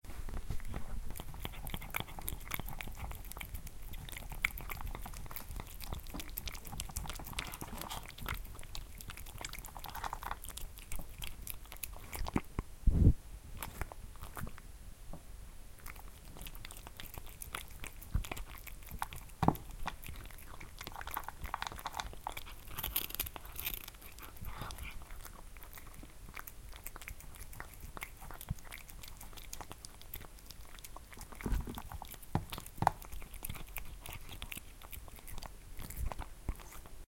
Cat eats its dinner
eating, pet, spsst, food